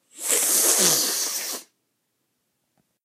Stuffy Nose
flu, nose, sick, sneeze, sniff